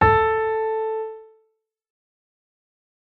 a midi note